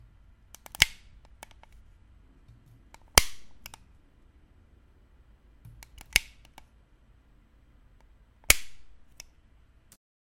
A flashlight switching on and off.
click, switch